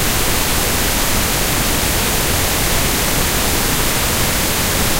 independent pink noise bw7k
Independent channel stereo pink noise created with Cool Edit 96. Brainwave synchronization frequency applied in 7k range.